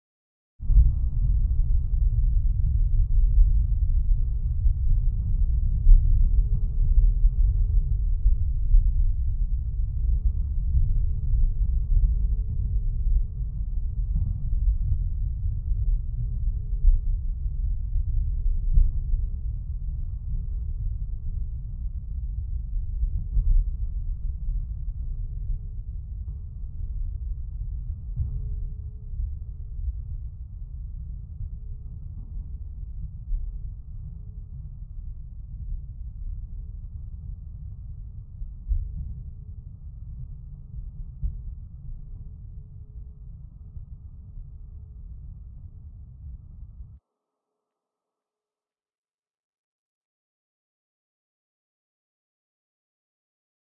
nuclear rain slower-bass
End of boiling.
Recorder with Zoom h2n
Processed in Reaper
From series of processed samples recorded in kitchen.
nuclear, rain, fx, weird, sci-fi, ambience, bass-havy